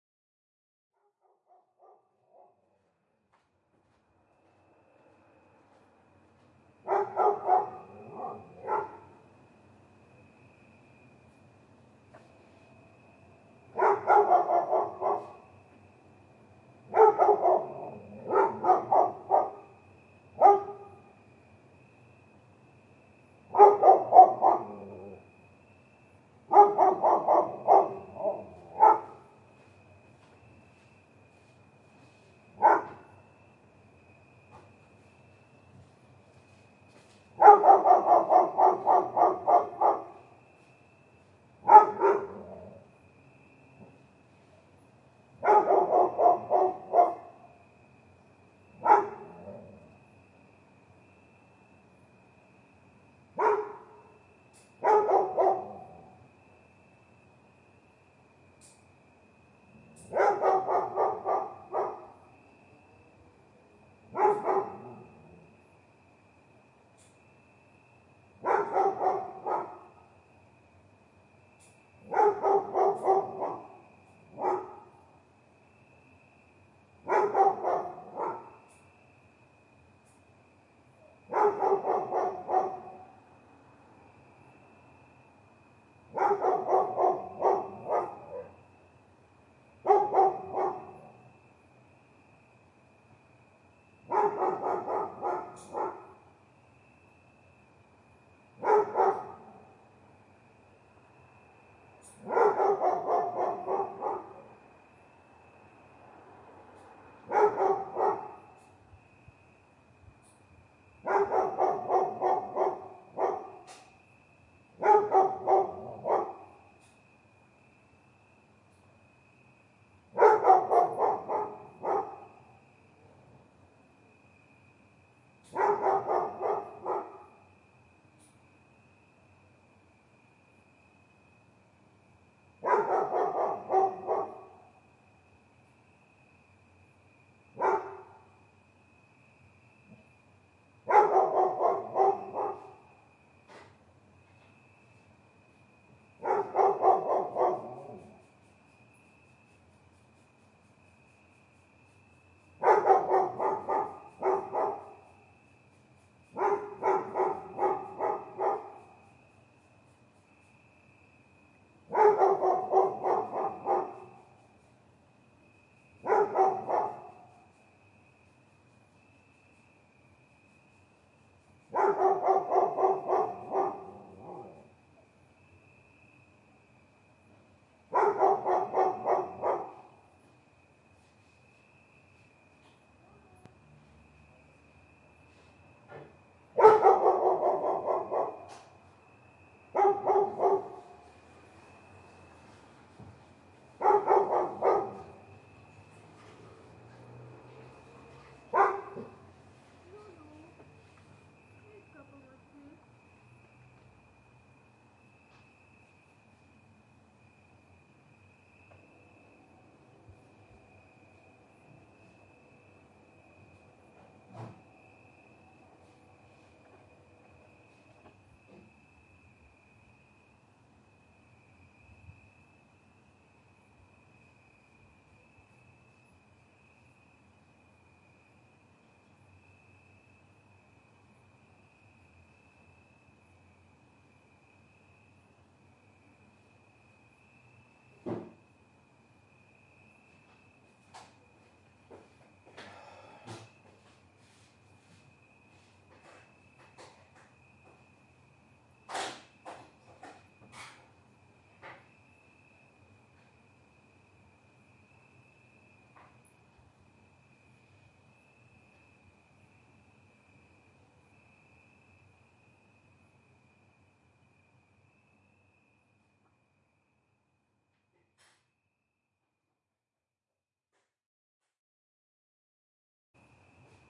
Dog bark, Open window, Human movements, -23LUFS
Recorded in Budapest (Hungary) with a Zoom H1.
ambience, animal, bark, barking, cricket, distant, dog, field-recording, growl, growling, human, movement, night, rumble, sigh, talk, traffic, window